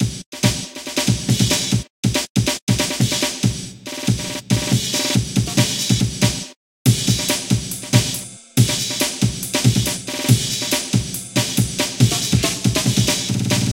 Jungle Drums based off of amen breaks
I created this drum loop based off some individual amen drum sounds I found on this site. The bpm is 140
140, tempo, Jungle, breaks, bpm, drums, amen, mutes